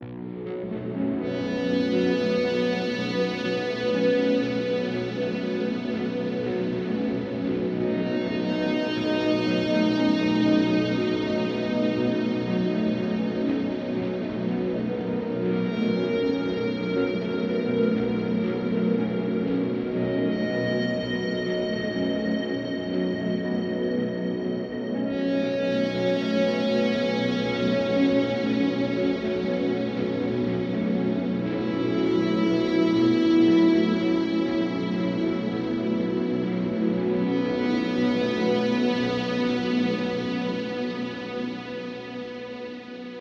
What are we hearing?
Ambient Guitar Sounds
Ambient Guitar soundtrack
sounds, movie, hippie, realaxing, guitar, echo, realax, reverb, ambient, flying